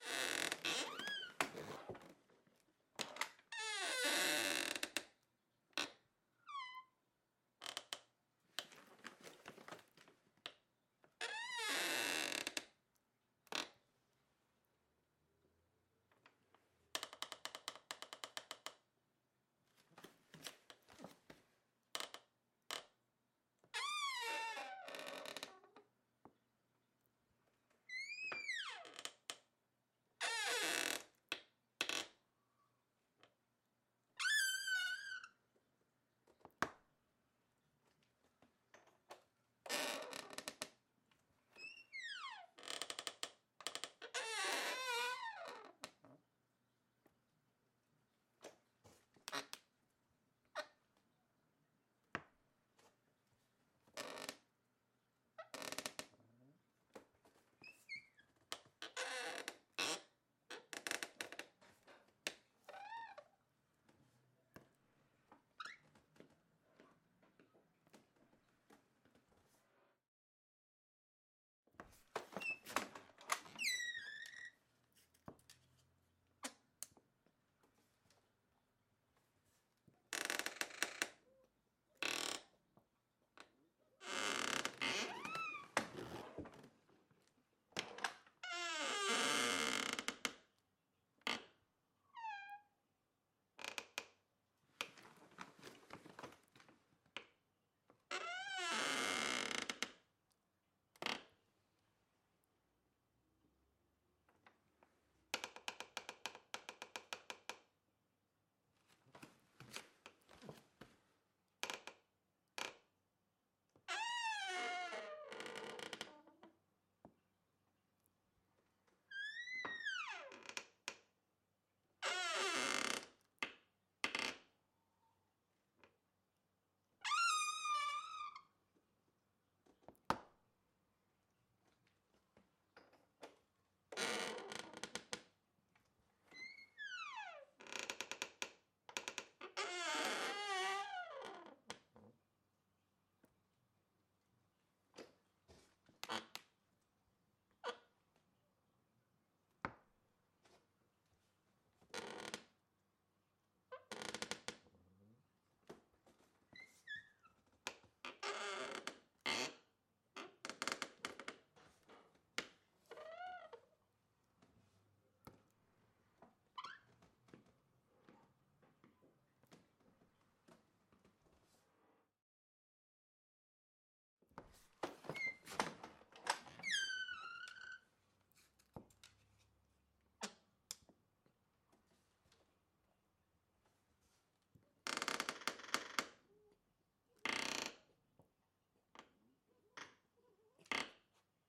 wood bathroom door creaks
recorded with Sony PCM-D50, Tascam DAP1 DAT with AT835 stereo mic, or Zoom H2